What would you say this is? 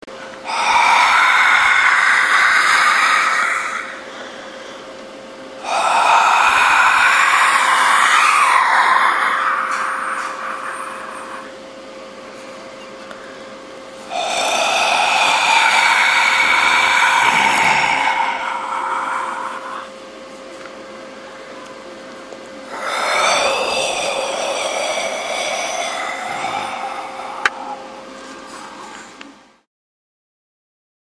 Ghost Breathing
It was made by me breathing into the microphone to make it sound that way. (Includes some background noise.) Taken with a Sony IC digital voice recoder.
Halloween, breath, breathing, creepy, ghost, haunting, scary, spooky